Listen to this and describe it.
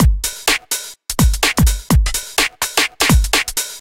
duppyGarage01b 126bpm
Funky UK Garage-style beat with TR909 hi hat, and synth kick and snare